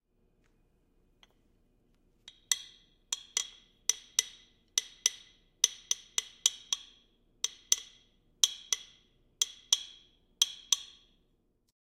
Tapping glass with drum sticks, unique sound when wood hits glass.